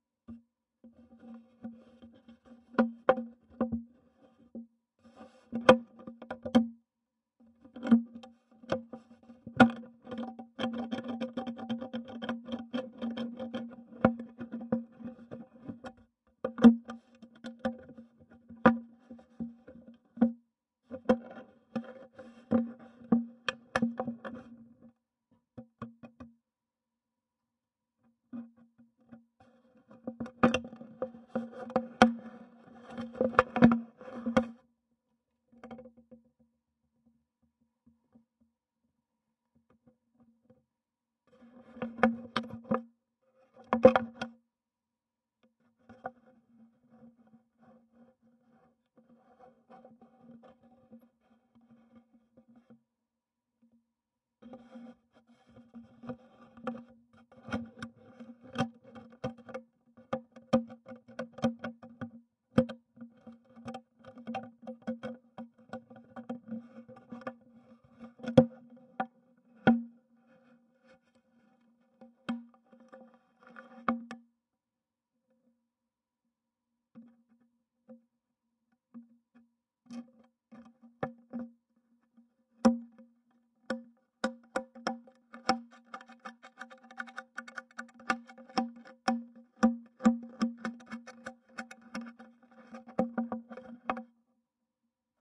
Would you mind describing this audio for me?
delphis FRESH DRINK BOTTLE 3
Selfmade tools where the microphone is placed into it. Mics Studio Projects S4 and RAMSA S1 (Panasonic). Record direct into Cubase4 with vst3 GATE, COMPRESSOR and LIMITER. Samples are not edit. Used pvc pipes, guitar strings, balloon, rubber, spring etc.
bottle, c4, delphi, pipe, pipes, plastic, pvc, rubber, s1, s4, spring, string